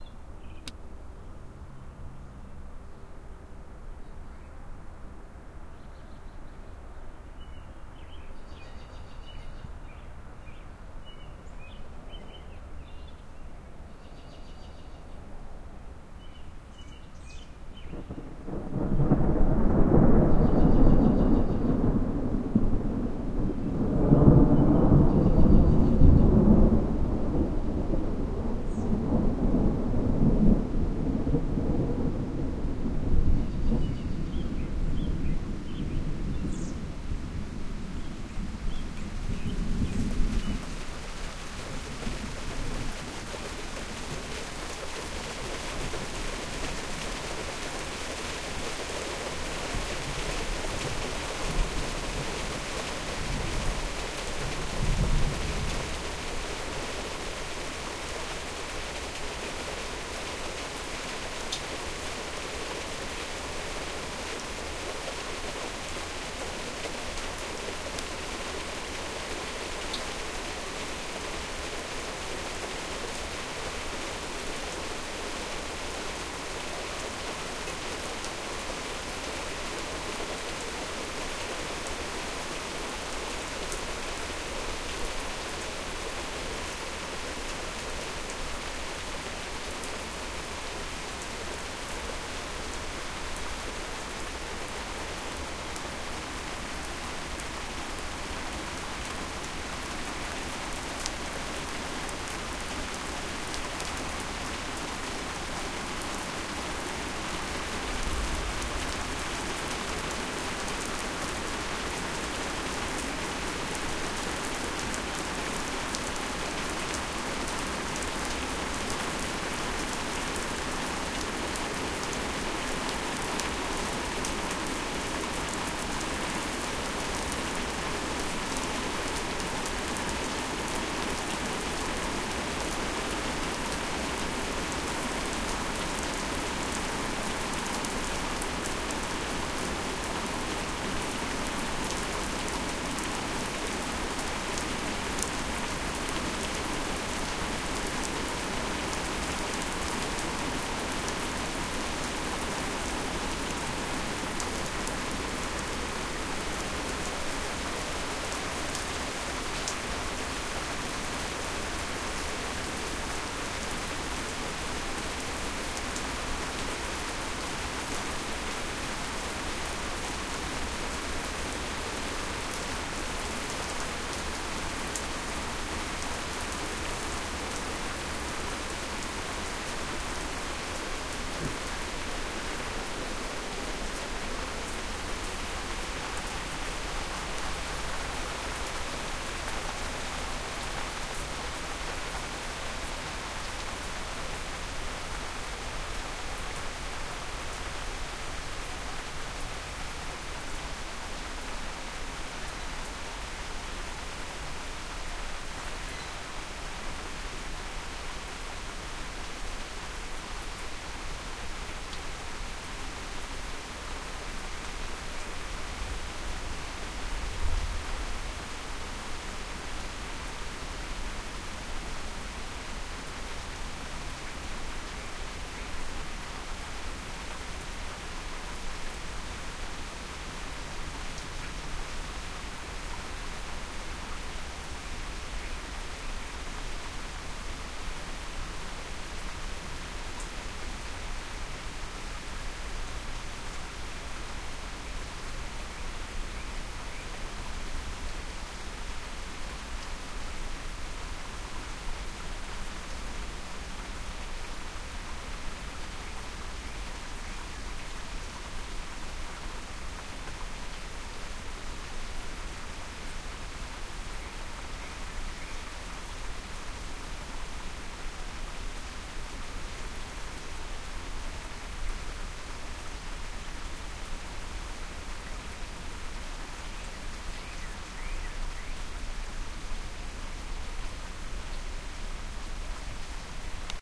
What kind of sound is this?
Thunder Introduces Rain
This sounds as if it were staged by Hollywood, but it actually did happen, I swear! Birds chirp away to set the scene, but a beefy thunder roll intrudes. Soon after, raindrops begin to fall as if on cue.
Theres a little handling noise click in the begining that is easy to crop out if you like. The recording is unedited.
At 1:35 I move the mics closer to the deck so you can hear the splashing. At about At 3:10 I you’ll hear a different timbre as I move away from the rain and under an awning. About 3:45 the rain settles down and the birds start chirping again. Hopefully there are some useful segments in here.
Cheers.